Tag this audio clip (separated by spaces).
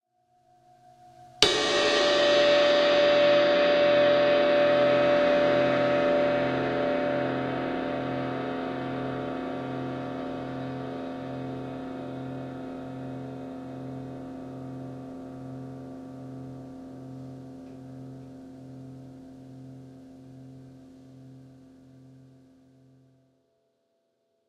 Royer cymbal-swoosh cymbal-swell ribbon-mic